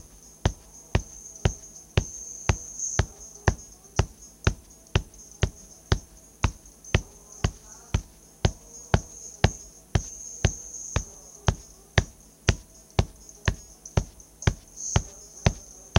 RFH Percussion 1
A collection of samples/loops intended for personal and commercial music production. For use
All compositions where written and performed by
Chris S. Bacon on Home Sick Recordings. Take things, shake things, make things.
piano, acapella, samples, Folk, acoustic-guitar, rock, percussion, drums, harmony, Indie-folk, loops, bass, beat, free, vocal-loops, synth, loop, original-music, guitar, whistle, drum-beat, melody, voice, looping, indie, sounds